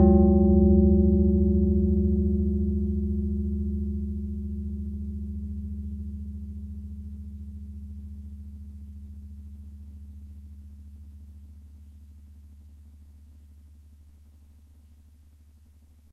Soft strike on a very big wok. Sounds very warm with slight modulation and gentle attack
gong, kitchen, percussion, wok